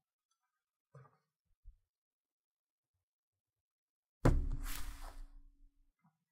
Sliding Book
The sound of a book being dropped and slid, perhaps across a table or under a door. Recorded on Blue Snowball for The Super Legit Podcast.